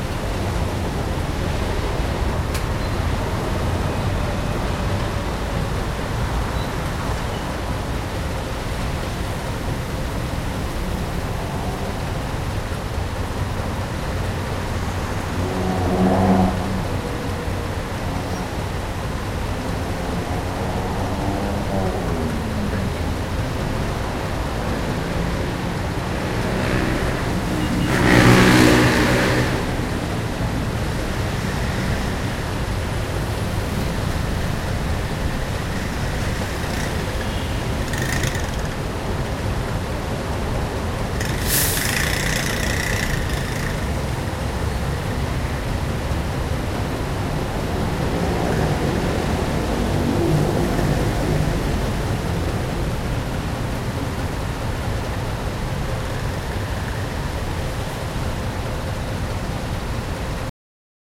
I recorded a short moment while cars and motorbikes driving in the streets. You also can listen to people.
Recorded with Zoom H1 and cut with Adobe Premier.